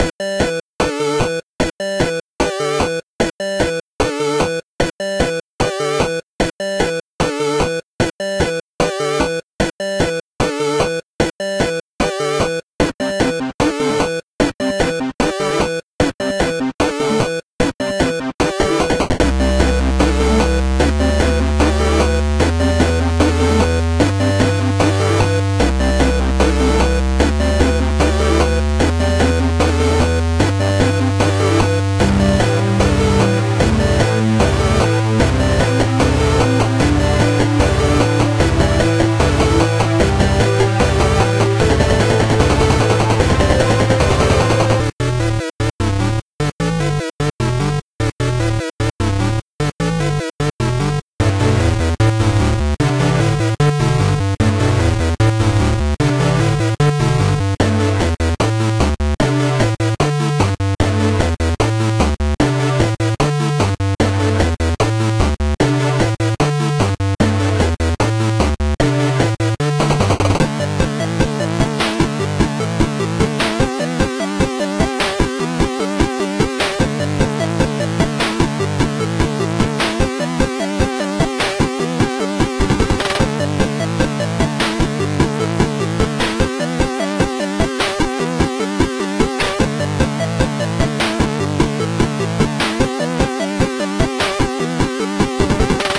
Retro arcade music #5
You can use this loop for any of your needs. Enjoy. Created in JummBox/BeepBox.
chiptune, sample, game, background, soundtrack, ost, arcade, electro, music, atmosphere, rhythmic, melody